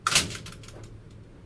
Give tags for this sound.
closing
door
elevator
field-recording
library

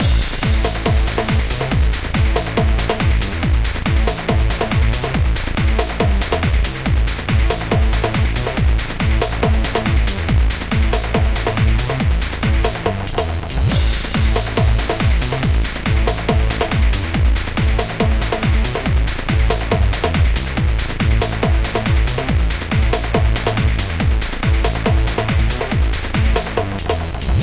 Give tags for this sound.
140-bpm
140bpm
bass
beat
breakbeat
drum
electro
hit
stomping

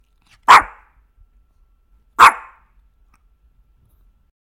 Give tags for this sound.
animal
aww
bark
cute
dog
funny
ringtone
ruff
tiny
yap
yip

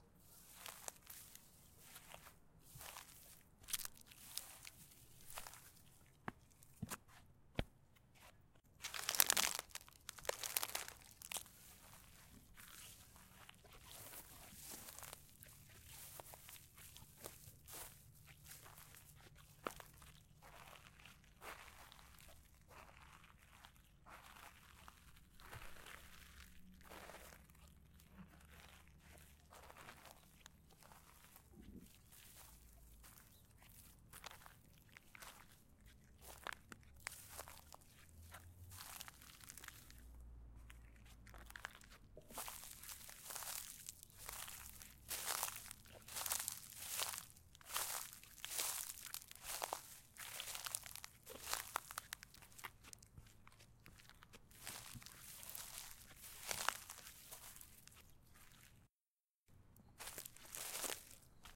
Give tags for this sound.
field-recording
foot
footsteps
grass
rock

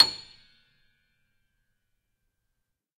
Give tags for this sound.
Grand-Piano
Upright-Piano
Piano
Keys

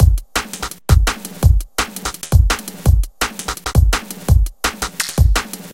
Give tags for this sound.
bass
drum
break
loop
168bpm
n
synth
beat
breakbeat
electronic

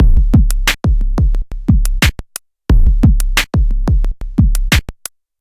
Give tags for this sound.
89bpm
beat
cheap
drum
drum-loop
drums
engineering
groovy
loop
machine
Monday
operator
percussion
percussion-loop
PO-12
pocket
rhythm
teenage

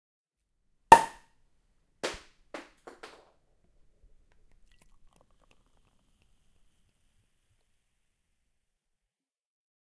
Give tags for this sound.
pop
bottle
bang
unpop
champagne
cork

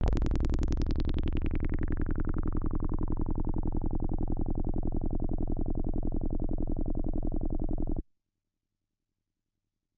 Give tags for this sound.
synth
multisample
synthetizer
C-1
single-note
ddrm
cs80
analogue
midi-note-0
midi-velocity-53
deckardsdream

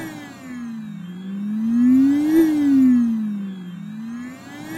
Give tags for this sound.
generator
machine

